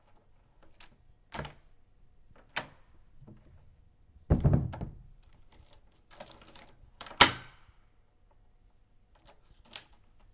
Dare12 5 door
Open my door an use the key.
dare-12, door